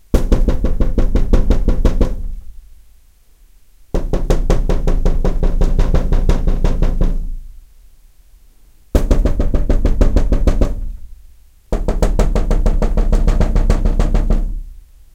window rapping
Knuckles rapping on glass windowpane
glass, insistent, knocking, rapping, tapping, urgent, window